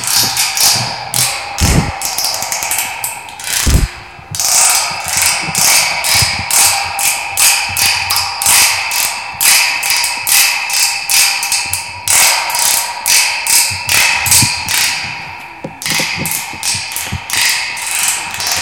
OM-FR-penonfence
Ecole Olivier Métra, Paris. Field recordings made within the school grounds
France, Paris, recordings, school